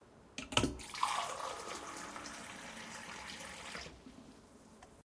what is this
mySound GWECH DPhotographyClass serving water
flow, liquid